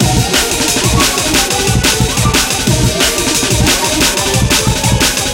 A jungle loop based on the amen break, done using Flstudio 7 @ 180bpm